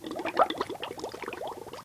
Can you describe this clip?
Blowing bubbles into water through a plastic straw.
bubbles, bubble, water, pop, blow